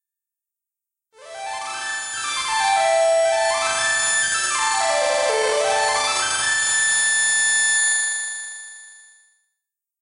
Logotype, Nostalgic
nostalgic, logotype, intro, Freesound15Years, documentary, game, logo, outro, outroduction, introduction